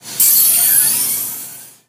door, open, space hatch, hydraulic
Hydraulic-sounding hatch open. Tripod hydraulic closing recording manipulated EQ and pitch shift plug-ins.
spaceship, door, hatch, sci-fi